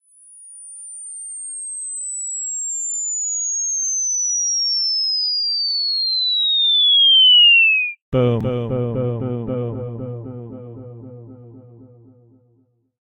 BigAI5... wanted some whistling bomb sound. Here's one I generated with a variable sine wave from about 10KHz to about 700Hz. I put a little live ambience re-verb on the front end... and took it out as it got closer... and added a vocalized 'boom'all generated on SoundForge8
electronic, synth, effect, tones, soundeffect